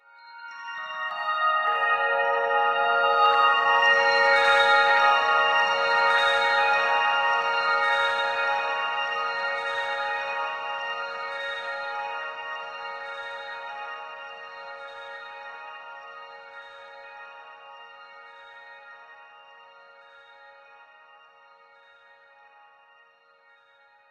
The high notes from a Rhodes light up the night, the blurry background of the city but not like a car commercial.
twinkle, piano, dreamy, texture, rhodes, ambient